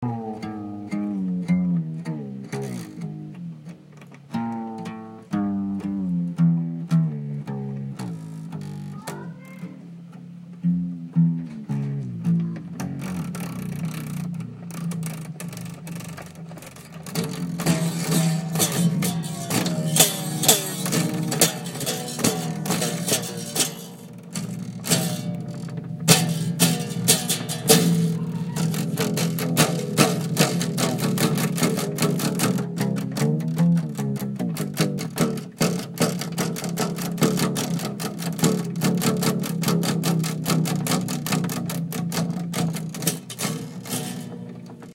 guitarra desafinada
A friend of mine was changing his guitar strings so I decided to record it on my iPhone.
out, tone, Guitar, nylon, guitarra, detuning, acoustic, desafinada